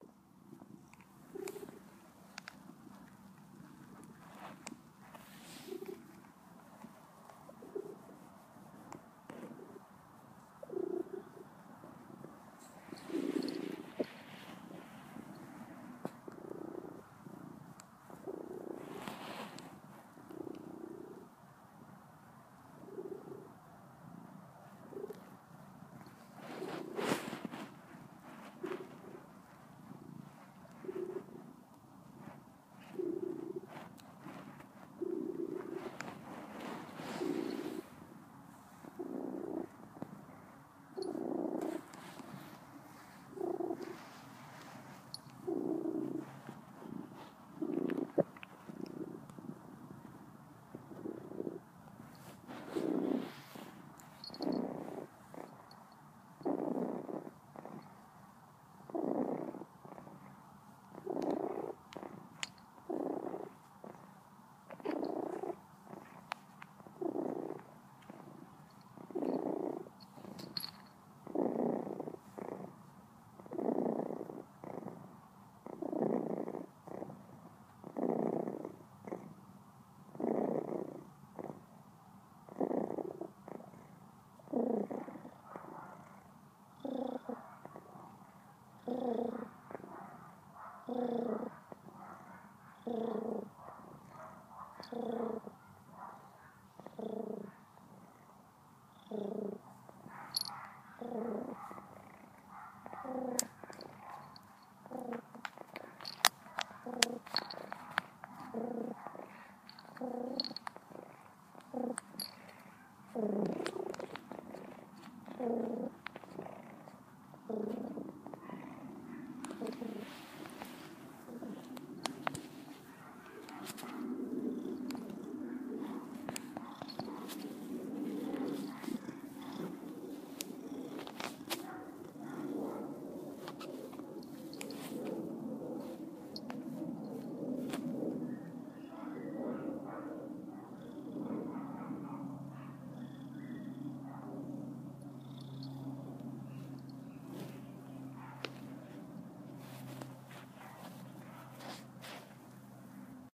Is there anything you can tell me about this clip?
Cat Purr
Cat, Purr